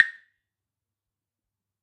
Small "Wooden Fish" or Mokugyo temple block from Kohya-san, Japan, played with the original cloth covered beater. Recorded in mono with an AKG 414, Fredenstein mic amp, RME Fireface interface into Pro Tools
Wooden, temple, wood, block, Buddhism, Fish